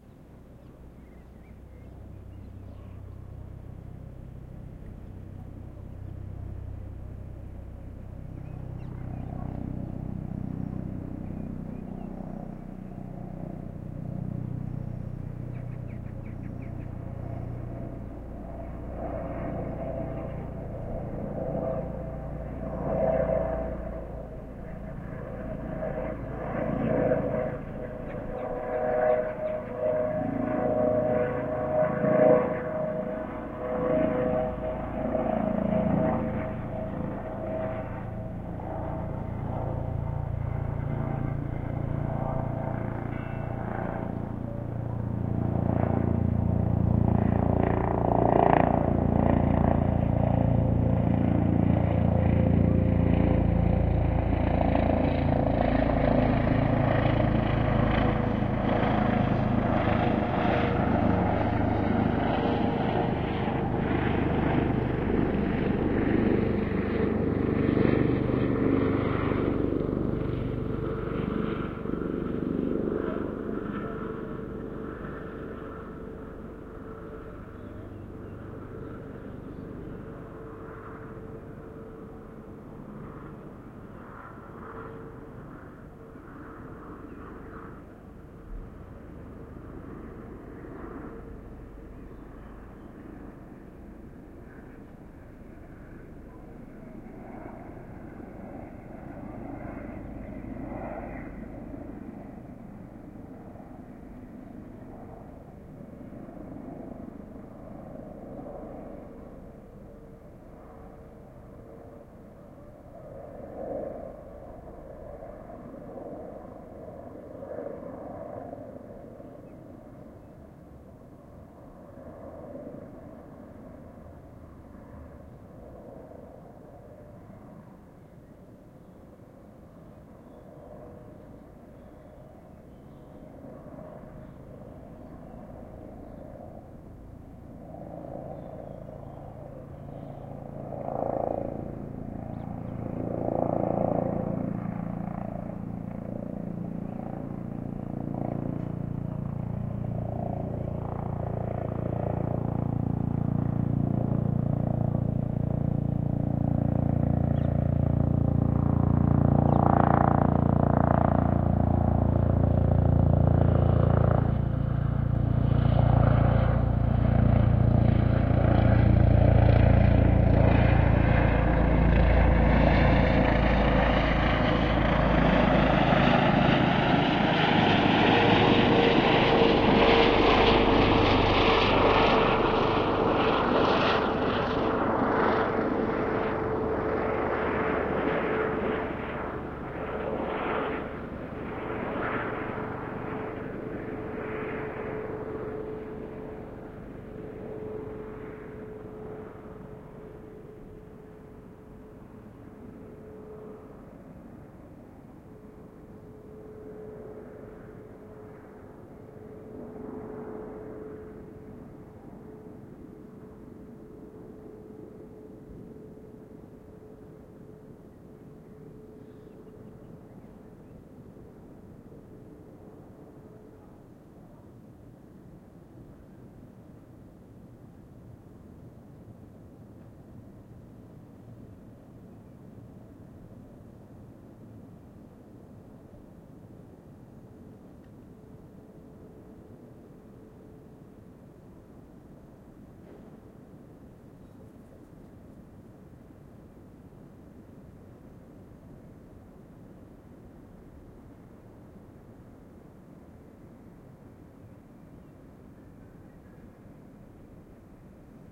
helicopter in Amsterdam
police helicopter flying around on a Thursday evening in Amsterdam.
2x Crown PCC160-> TC SK48.